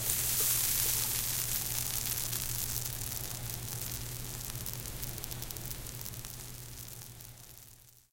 White Noise Intro
Some sounds I picked up from my new katana sleeves using a Sony ux560f recorder.
UX560F, intro, katana, sleeves, ICD, white-noise, cards, sony